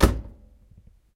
closing washing machine 07
The sound of closing the door from a washing machine.